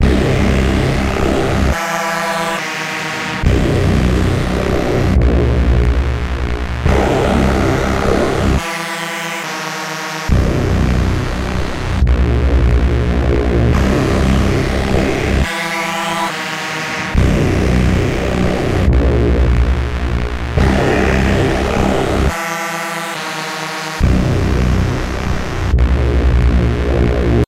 Hard reese with notch filter and a phaser. Weird.